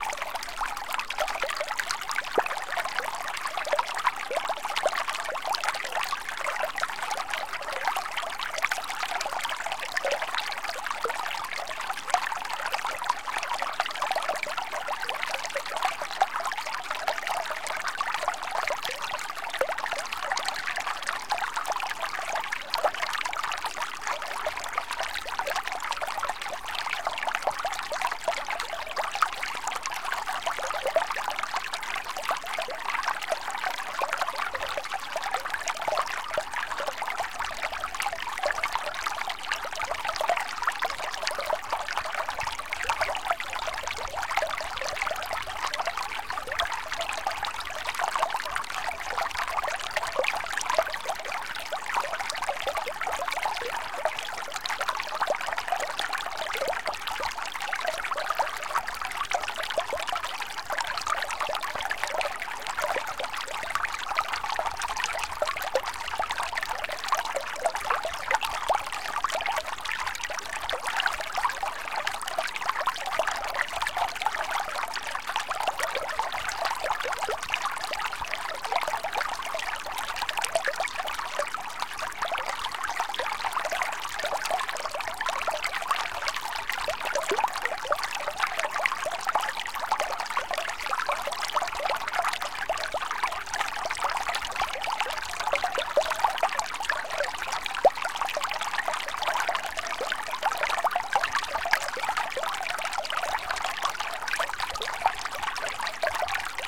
national water 06
One in a series of recordings of a small stream that flows into the Colorado River somewhere deep in the Grand Canyon. This series is all the same stream but recorded in various places where the sound was different and interesting.